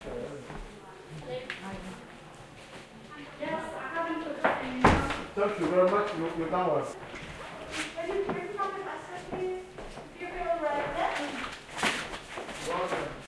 Hospital sounds 4
I recorded this on a visit to a London hospital in May 2008. The sound of nurses and a slamming door.
ambience, atmosphere, door, field-recording, footsteps, hospital, speech, voice